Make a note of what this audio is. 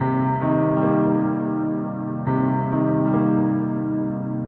My record-tapeish Casio synth’s piano one more time! And it loops perfectly.